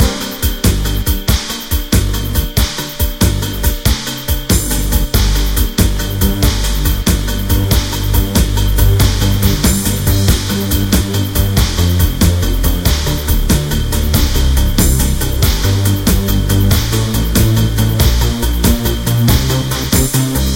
Loop Hard Working Alien 03
A music loop to be used in fast paced games with tons of action for creating an adrenaline rush and somewhat adaptive musical experience.
battle, Video-Game